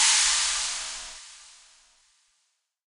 nice Swish Knocker
crash, percussion, drum kit
drum, crash, percussion, kit